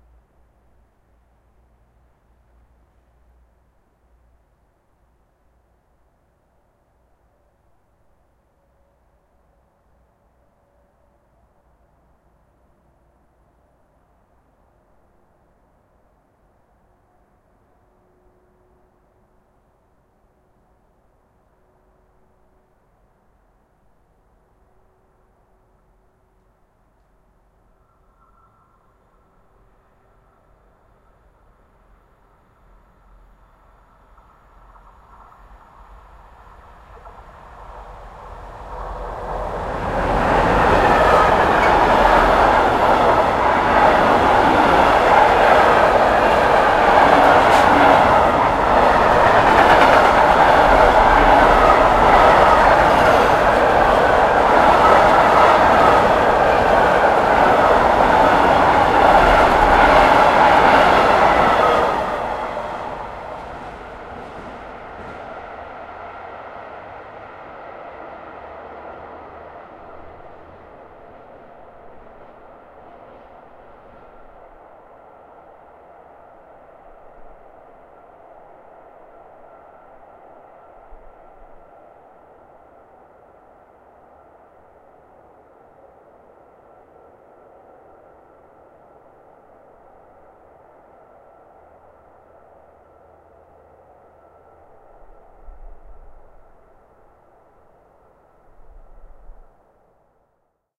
19.09.2015: around 19.00 p.m. Noise of passing by cargo-train. Recorded in Torzym (Poland).